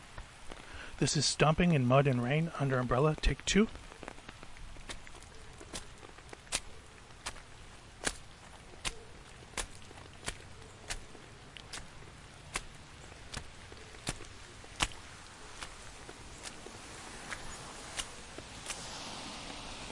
FIELD LA Rain Park Under Umbrella-Stomping in mud 02
What It Is:
Me walking in Griffith Park while it's raining.
Various vikings walking on the battlefield.
walking, AudioDramaHub, footstep, field-recording, rain, stomp, mud, water